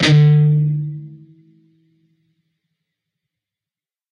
D (4th) string open, G (3rd) string, 7th fret. Up strum. Palm muted.